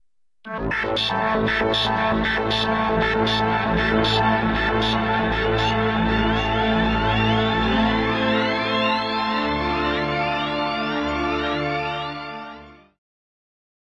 Space alien intro 5
Atmospheric intro with echo space sounds
ambient, sountracks, delay, synth, intro, melodic